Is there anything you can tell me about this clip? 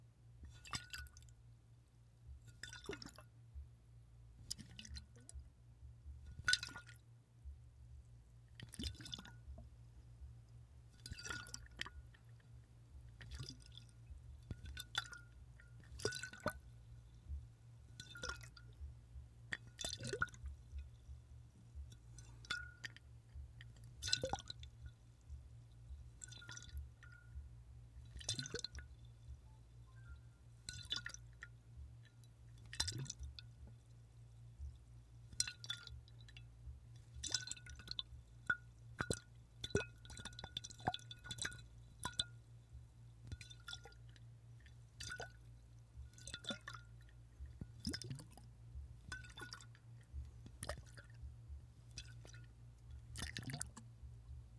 Sloshes in Whiskey Bottle FF277
Jabbing ice and liquid in container, ice clanking against container, faster
ice, container